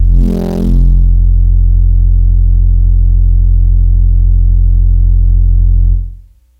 TX81z Home Base F1
This is a raw wave multi-sample created with a Yamaha TX81z FM synthesizer. It is a dirty sort of bass sound which is gritty at the start and gets rounder at the end. The file is looped correctly so it will play in your favorite sampler/sample player. The filename contains which root note it should be assigned to. This is primarily a bass sound with notes from C1 to D2.
bass, digital, dirty, fm, grit, loop, multi-sample, multisample, raw, sound-design, synth, tx81z, wave, yamaha